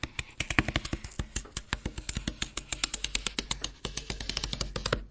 Queneau carton 05
grattement sur un carton alveolé
pencil, scribble, cardboard, paper, scrape, scratch